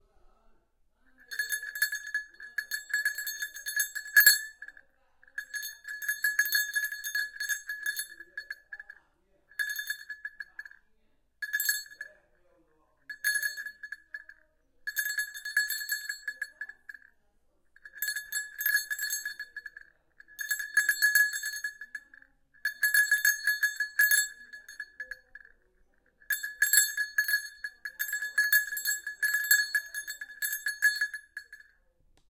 Cow Goat Bell Vaca Carneiro Sino Polaco Bells